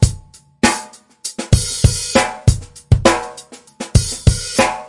This is a funk drum beat